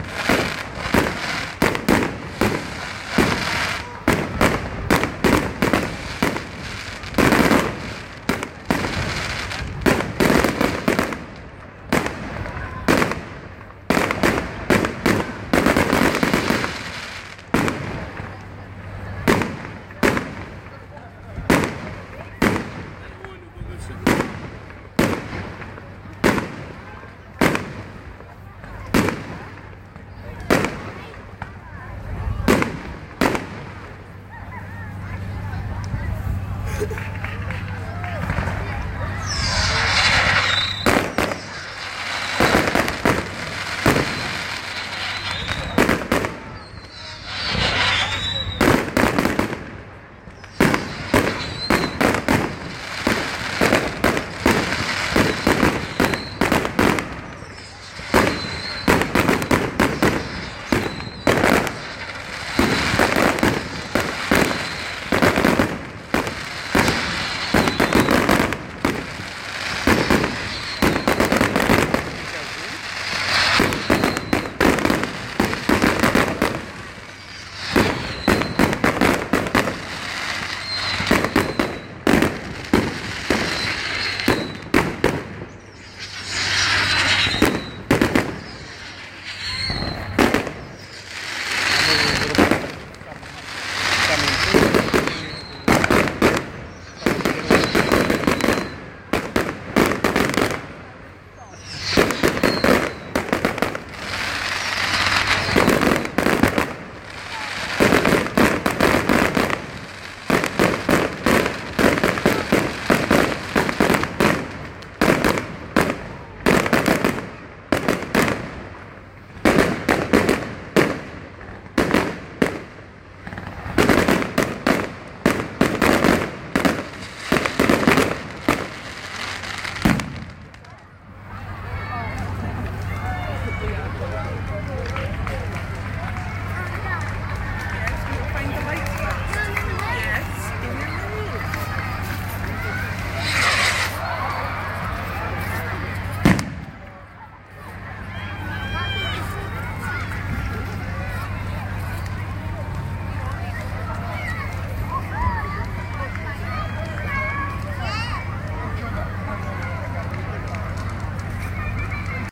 Content warning
I made the recording in the town of Denholm in Scotland. In the recording you can hear fireworks, firecrackers. You can also hear the sounds of conversations in the crowd, screams of children, the sounds of a burning fire.On November 5 this year people across the UK light bonfires, let off fireworks, and burn effigies of a man named Guy Fawkes. The reason we do this is because it’s the anniversary of the Gunpowder Plot (1605); a failed attempt to blow up the Houses of Parliament in London by a group of dissident Catholics.
explosion, rockets, fire-crackers, boom